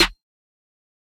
Trap Snare 2
Most commonly used in trap, hip hop, and other electronic music. The origin is unknown, this sample is very frequently used in lots of modern music.
electronic, trap, snare